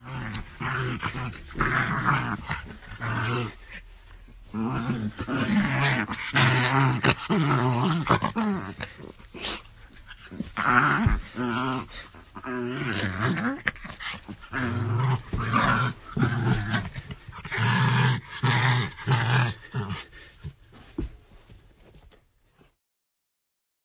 Dog/Gremlin
A friendly little dog does her best impression of a gremlin. Recorded on an iPhone so not incredible quality.